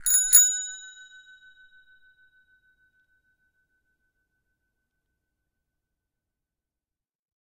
Bike bell 01
Bicycle bell recorded with an Oktava MK 012-01
bell, bicycle, bike, ring